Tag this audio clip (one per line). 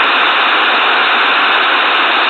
computer
unprocessed
powerbook
cut
call
modem